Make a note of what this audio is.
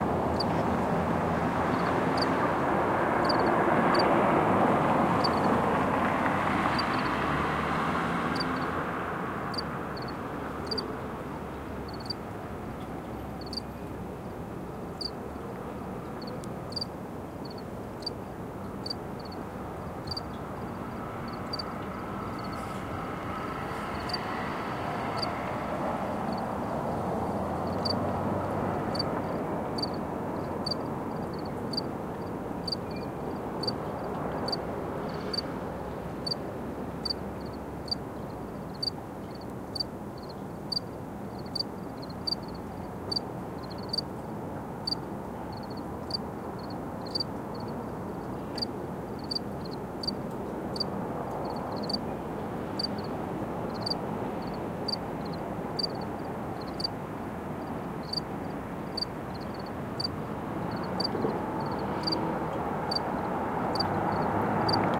crickets around motorway
Autumn. Chirr of crickets near the motorway. Noise of passing cars.
Recorded at 2012-11-02.
cars, chirr, city, crickets, noise, street, town